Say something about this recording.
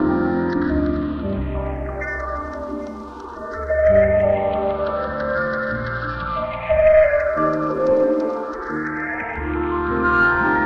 Piano Ambiance 10 [Bpm 90]
Ambiance, Ambience, Ambient, Atmosphere, Cinematic, Drums, Loop, Looping, Piano, Sound-Design, commercial